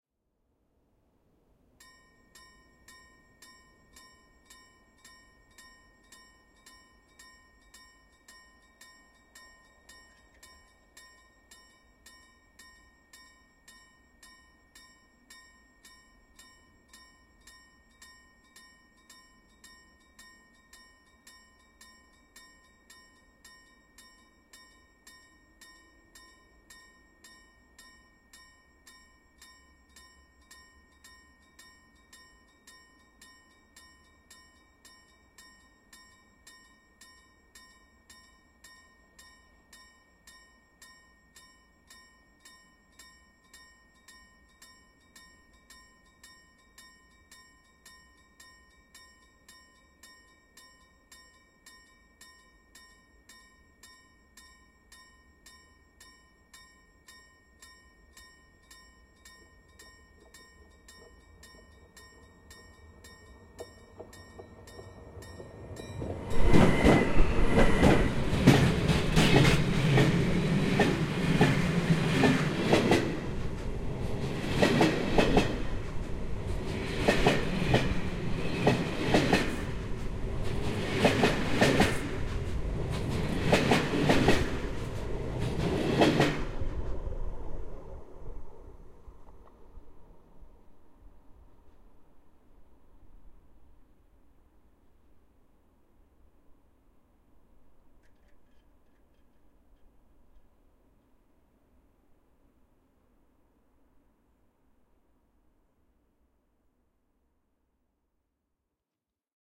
A freight train passing at road crossing point, recorded between the tracks (!).
track-crossing-bell, train